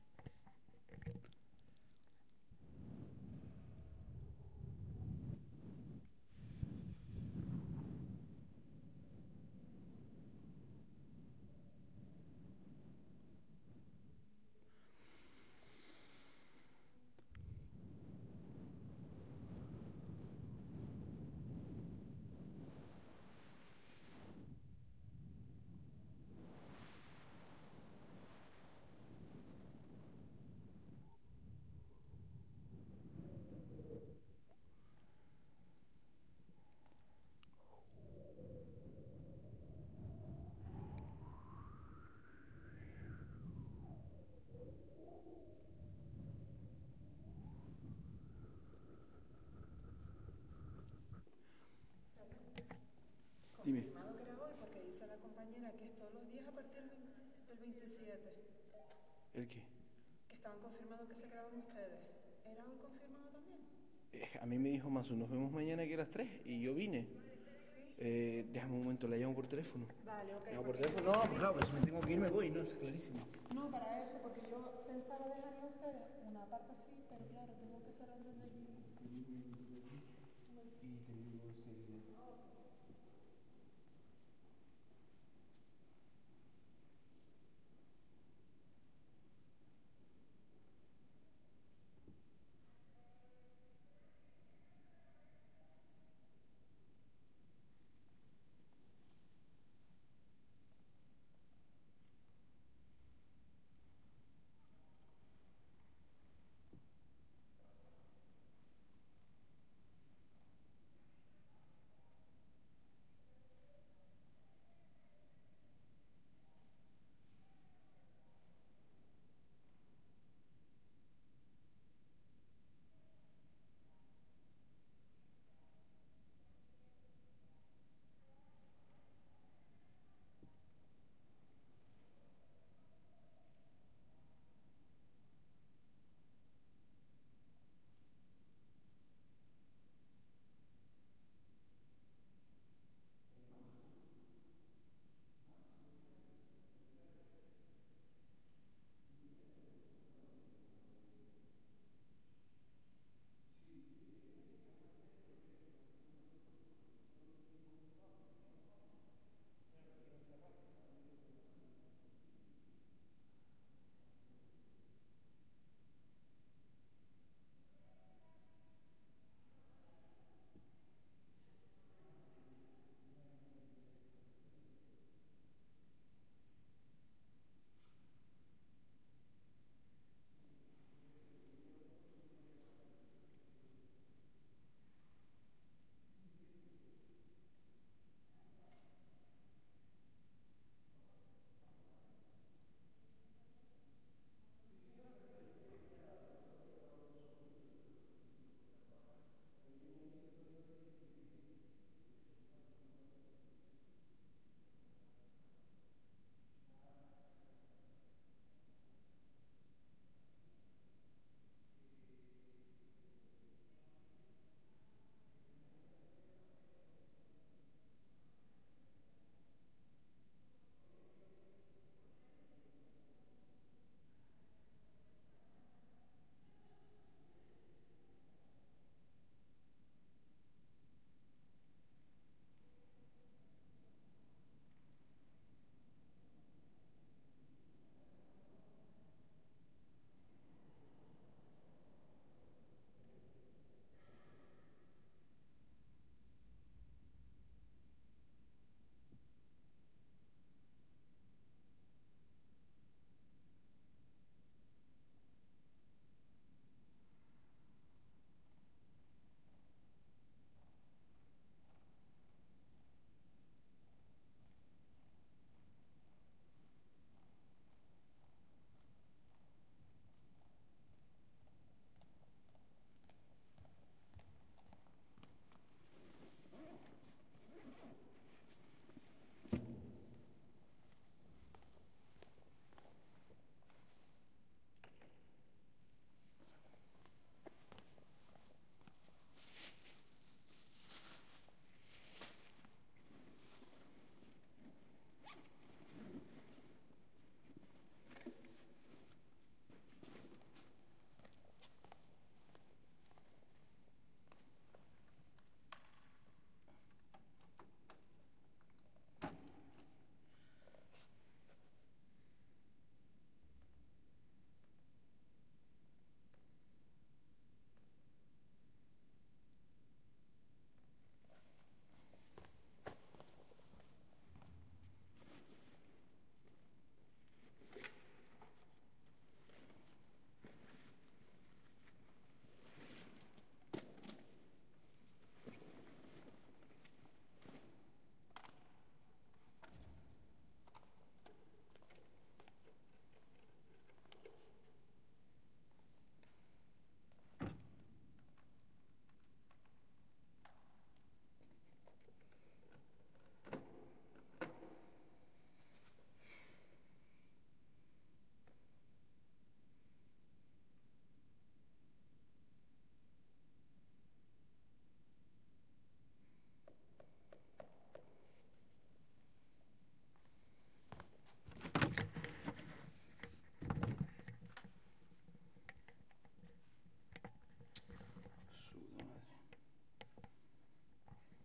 Some sort of "WInd" sounds i did by blowing directly into the mic of a Zoom H4 N.
It's not the real thing, but it can work...
body, breeze